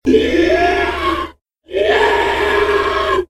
monster shriek
Sounds like a certain stock monster sound
monster, shriek, squeal, scary, pig